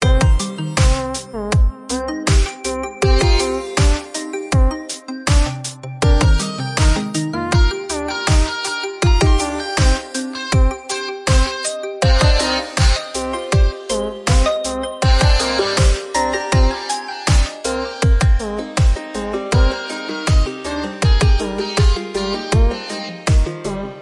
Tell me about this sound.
crystal party
free game like loop for indie games developpers , enjoy !!
ps: this was made by FL studio
ambiant, loop, game